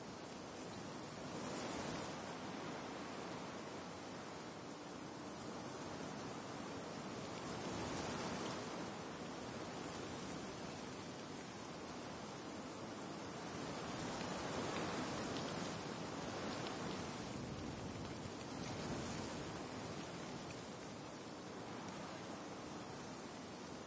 Ambiance (loop) of wind in forest/trees.
Gears: Tascam DR-05